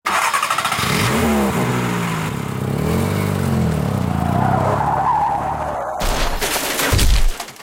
Car Crash Edit Two
This is a cue I built while sound designing the play "Wait Until Dark". I own the right to sound design this production and therefore own the right to this cue.
This is a 0:07 clip of car starting, revving its engine, and running someone over in an alley. Mixed in Apple Logic Pro 9. Recordings made with various SDC and Peavy PV8 Mixer.
car-crash, squealing-brakes, car-accident